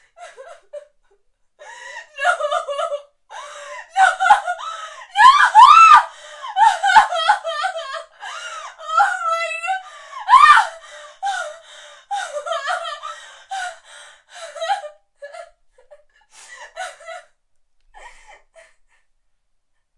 Sad Screams
Young lady heartbroken
crying, DM152, MUS152, sad, screaming